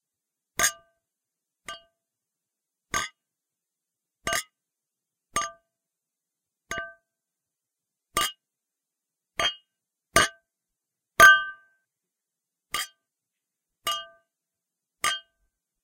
Glasses clinked in a toast
I made this sound by clinking an expensive wine glass and a common household glass because I didn't have TWO wine glasses. So I guess you could say this is only HALF authentic, but, oh well!
cheer, cheers, clink, glass, toast